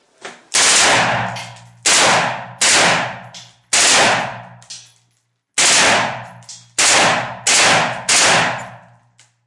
machine gun
several short bursts of 'full auto' fire from an M-16. Captured with my Sony HDR-CX160.
rifle, full